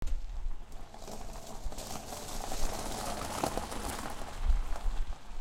ns carbygravel
A Honda Accord drives by on gravel from left to right
gravel, honda, accord, car, drive